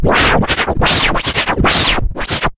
The right mouse button trick was only slightly successfull so processing was in order to achieve the different scratch sounds. Cross fader on 12 cups of espresso simulated with Cool Edit.
vinyl, flare, scratching